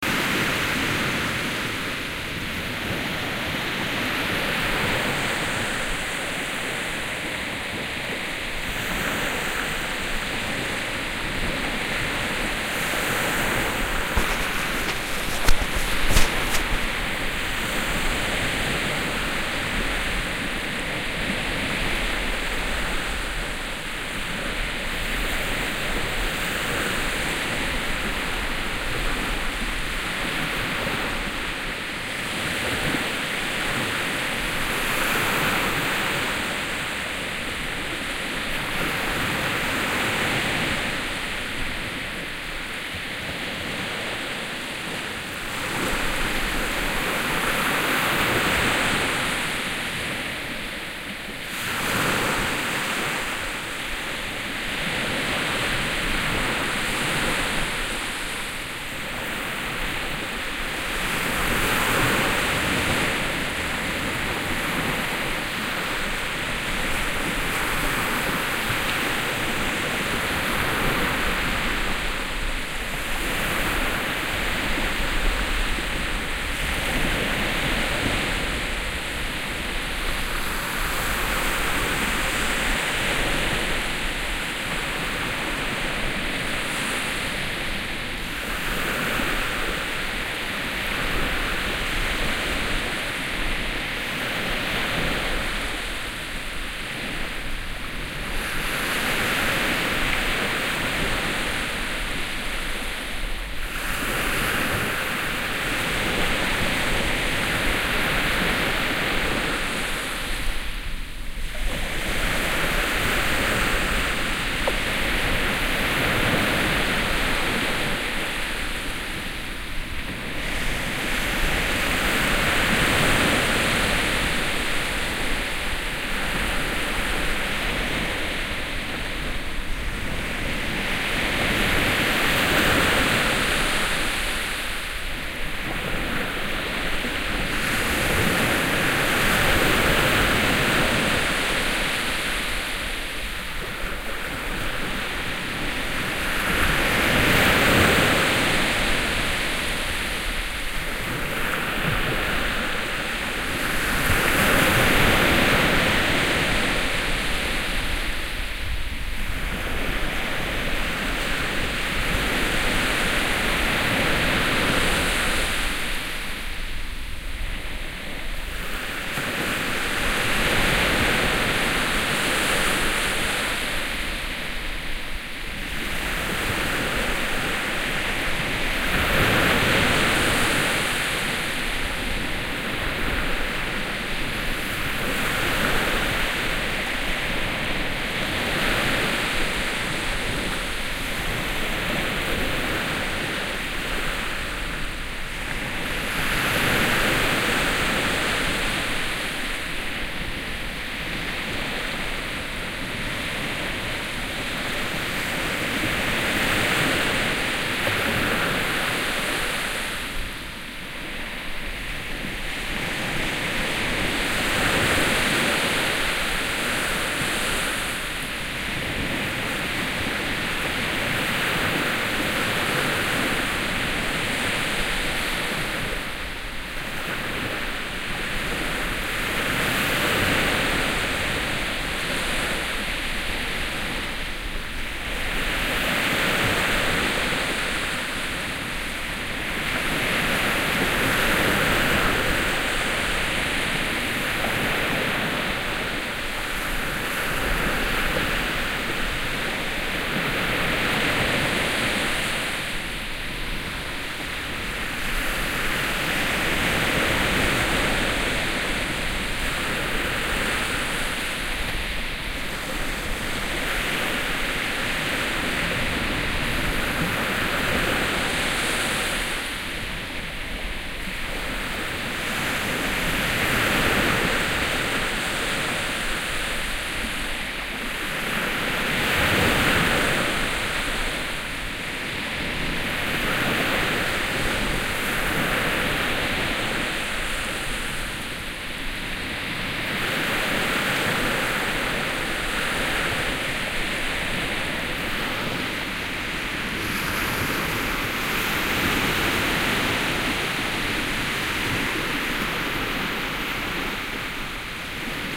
Seaside Waves
Recorded this quite a while ago in Italy with Soundman binaural mics and an iRiver mp3 player. Uncut. The mediterranean sea around midnight on a usually very crowded beach.
beach, binaural, mediterranean, ocean, sea, shore, waves